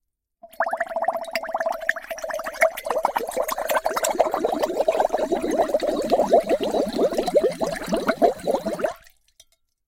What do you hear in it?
Short Bubbles descending into glass of water